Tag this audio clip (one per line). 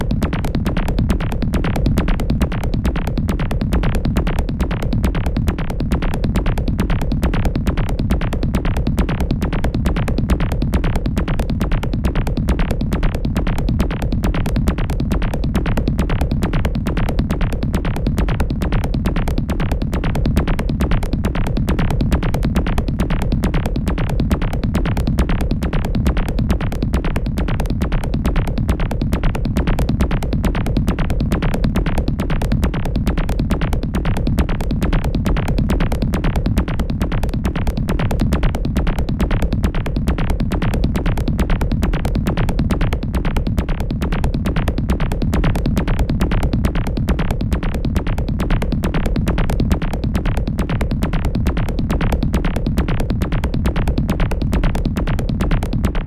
techno
loop